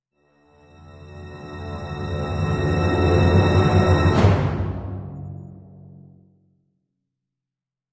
Orchestral suspense cluster using various instruments in a crescendo fashion.